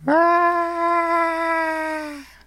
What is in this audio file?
Also known as rotom_scream02, this sound effect was recorded along with the first scream, but I never used this one myself.
cartoon, voice-acting, scream